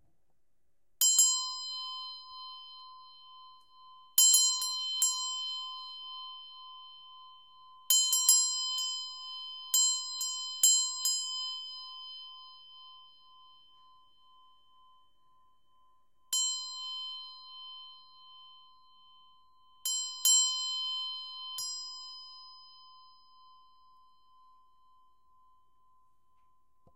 Korean Bell, Korea, Bell
Bell, Korea, Korean